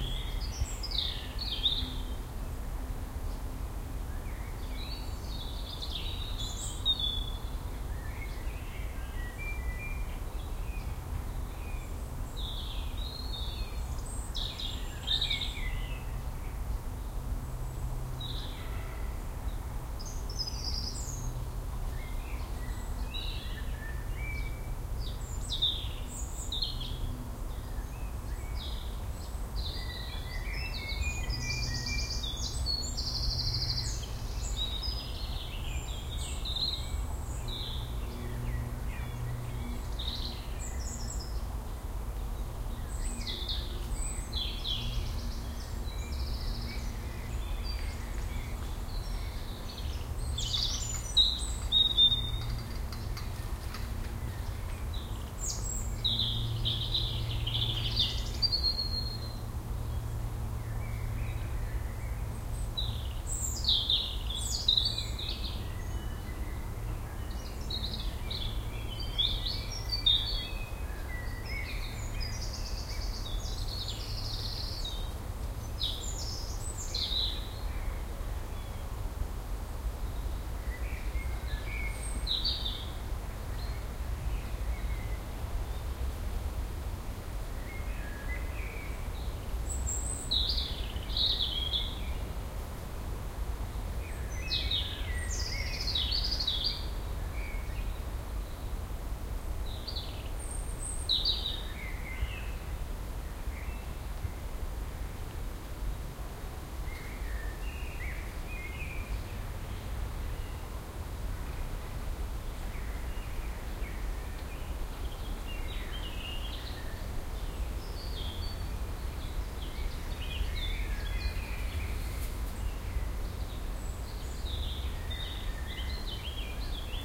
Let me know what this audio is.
This recording was done in the "Eilenriede", a park in Hannover, which is more like a forest in the middle of the town. I was sitting on a bench, using the Soundman OKM II and a Sharp IM-DR 420 MD recorder. A bloke with a cycle can be heard, too.